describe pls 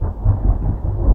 cloud2cloud
short, edited, percussive, thunder, loops